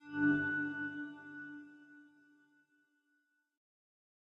Achievement, upgrade or release sensation.